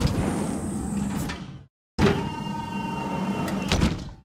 Recorded this cool sounding door in a hypermarket while shooting in Hungary. Open - close. Zoom h6 + NTG3.